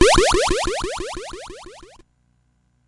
Atari FX 12
Soundeffects recorded from the Atari ST
Atari, Chiptune, Electronic, Soundeffects, YM2149